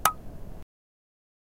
Tractor Ping #2
Play the city. Take objects whose purpose is to reproduce the social in its current configuration and turn them into something else by dint of a new orientation. New means, new methods, no ends; only process and the joy of experimentation.
Recorded with a Tascam Dr100 one cold evening on the Santa Cruz Wharf.
field-recording, hi-hats, sample-pack